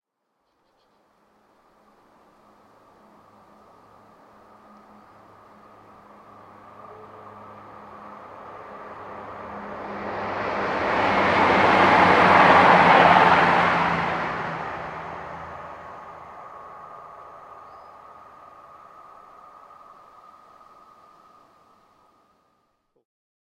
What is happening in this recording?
Train passing by forest 2
Recorded on Marantz PMD661 with Rode NTG-2.
Sound of an electric passenger train passing by a small forest in the countryside.
countryside, electric, english, exterior, field-recording, forest, pass-by, railroad, railway, tracks, train